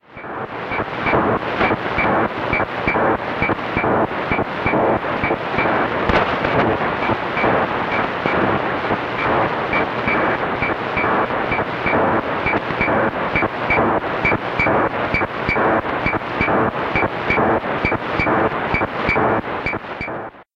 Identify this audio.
digital pulse 7mhz
A repeating pulse from a shortwave radio broadcast between 7-8 MHz. The frequency of the pulse is around 1000Hz with a noise component.
atmospheric, digital, electronic, noise, radio, shortwave, static